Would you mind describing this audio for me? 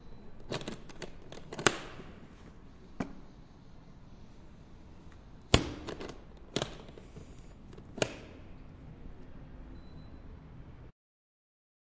OWI Opening and closing suitcase
Opening and closing a suitcase.